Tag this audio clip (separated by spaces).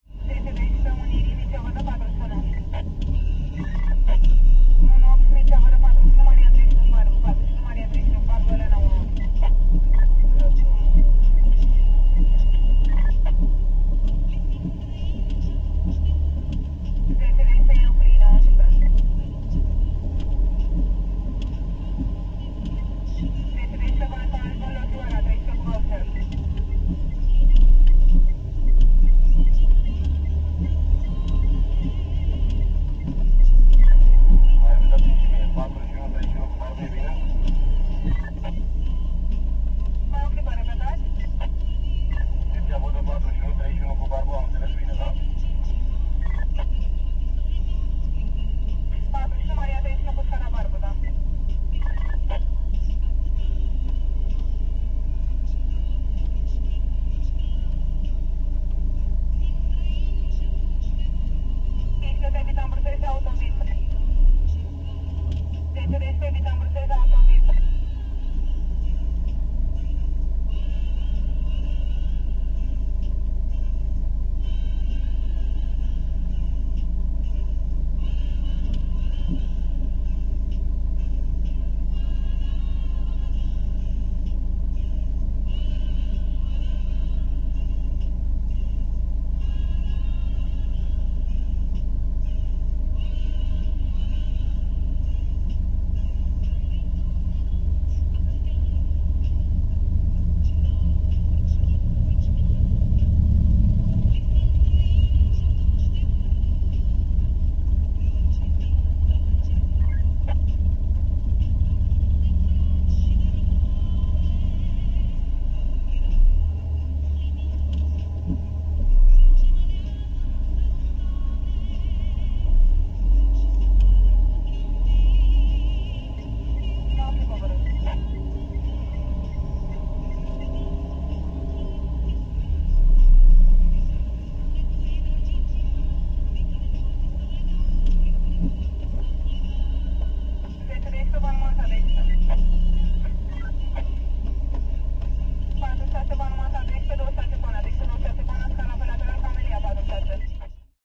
inside-car taxi field-recording Bucharest movie-sound